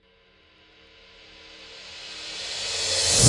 inverted Crashsound, recorded with Beyerdynamics "mce 530"
16" No-Name-Crash
Crash, cymbal, effect, invert, percussion, zoom